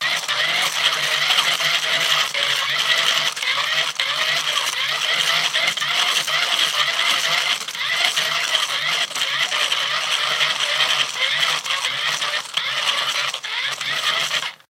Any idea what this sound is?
A small hand-crank generator being turned at a pretty quick rate. I didn't have a light bulb attached, but if I did, I imagine it would be flickering brightly at this speed.